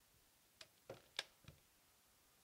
cerrar puerta de carro